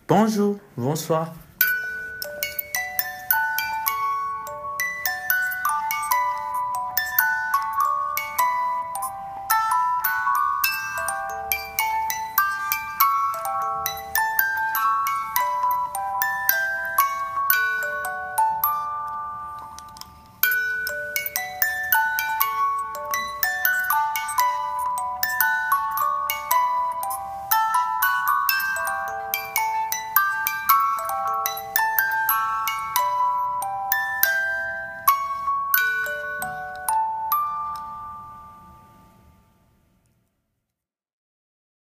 A manual music box, playing Edith Piaf's "La Vie en Rose".